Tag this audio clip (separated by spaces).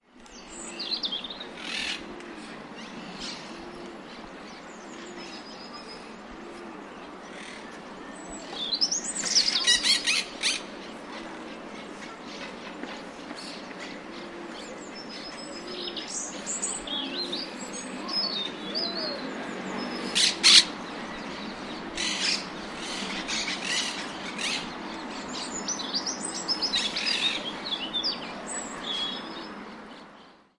pitroig sonsurbansnatura petirrojo Barcelona birds cotorra parakeet rupit Park robin